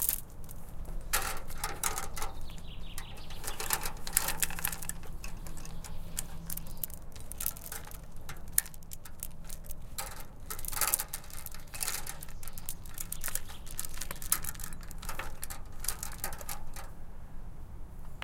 Recording made by residents from 'De Heikom / vzw Kompas' in Sint-Kruis-Winkel in the frame of the project 'Oorkanaal - Listening to the Ghent Harbor Zone'